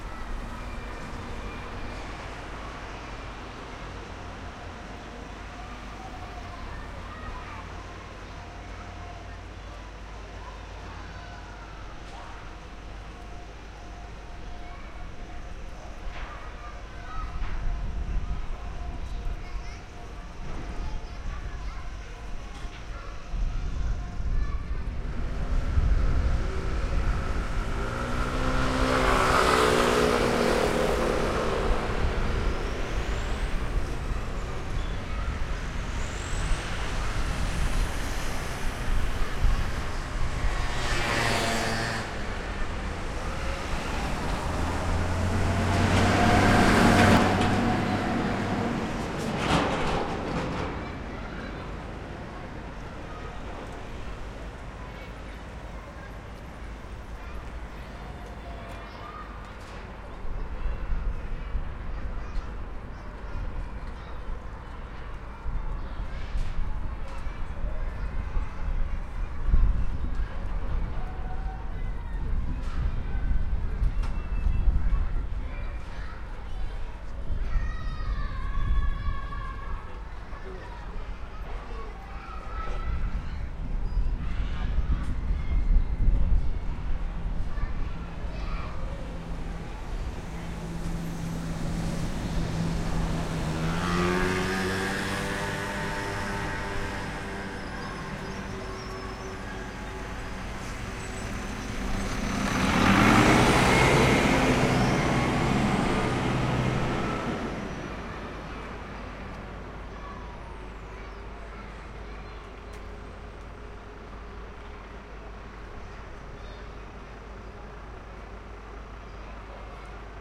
Calidoscopi19 Torre Sagrera 1
Urban Ambience Recorded at Torre Sagrera in April 2019 using a Zoom H-6 for Calidoscopi 2019.
Pleasant, Nature, Simple, Traffic, Calidoscopi19, Monotonous, Quiet, Humans, SoundMap, Sagrera